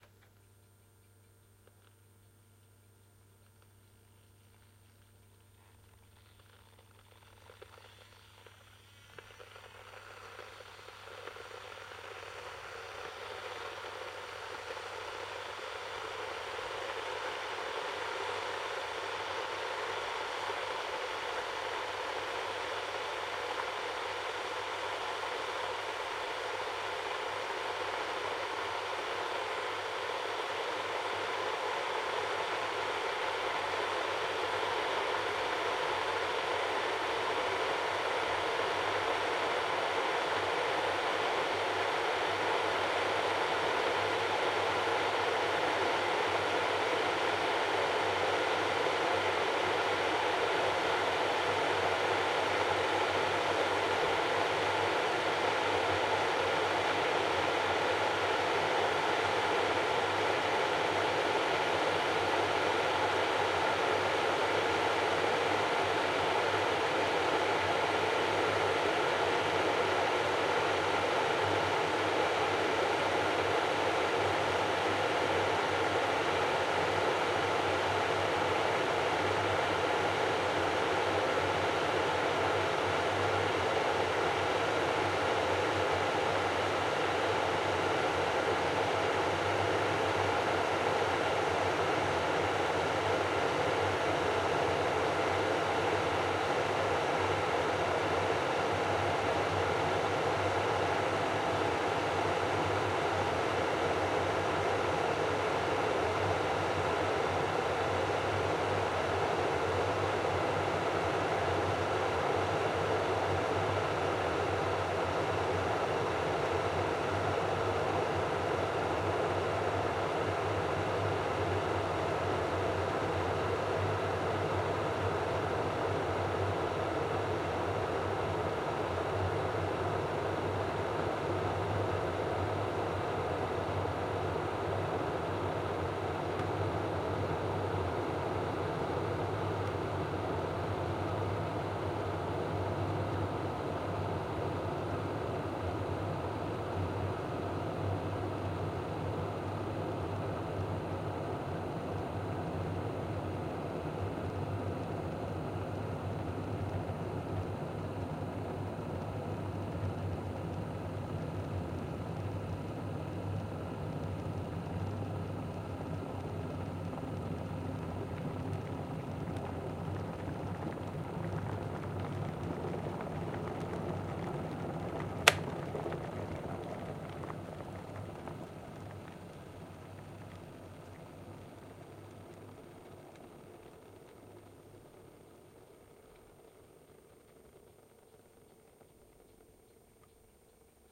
electric water boiler 2
Heating cold water in an electric water boiler - kettle until boiling and automatic switch off. Notice the different sounds which develop during increasing temperature. Sony ECM-MS907, Marantz PMD671.
heating, boiling, bubble